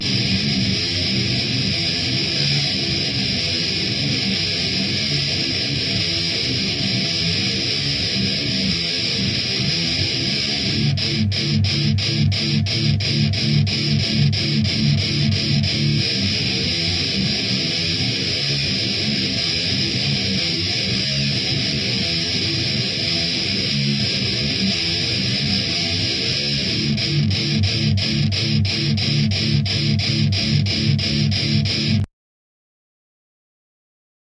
heavy loop1
190, bpm, groove, heavy, loops, rock, thrash